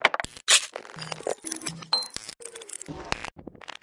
ReversePercGroove 125bpm03 LoopCache AbstractPercussion
Abstract Percussion Loop made from field recorded found sounds
Abstract, Percussion